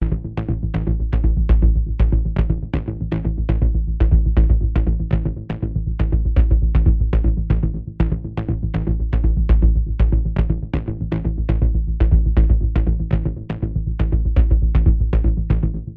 90s DUB BASS
low, edm, wobble, dubstep, bass, sub, LFO, effect, free-bass, Dub, Wobbles